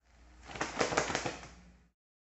este audio hace parte del foley de "the Elephant's dream"
bird, ave, fly